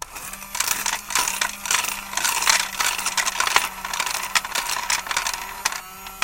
Recording of automatic change counter recorded direct with clip on condenser mic. From top with coins.